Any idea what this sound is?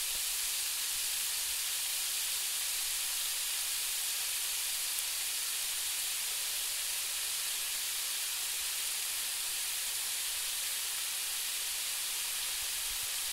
Cooking, frying 2
Onions frying in a hot pan
stove, sizzling, oil, frying, food, fry, cook, sizzle, kitchen, pan, cooking, pot